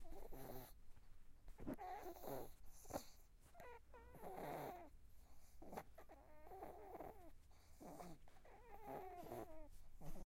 A dog snoring.